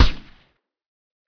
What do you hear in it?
Gunshot 2 laser
A mixed sound of a gun firing. this sound feels "lasery"
remix, shot, gun, laser